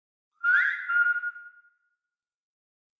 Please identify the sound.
This started out as me recording my some of my whistling and then I pitched it down and added some reverb.
creepy, eerie, reverb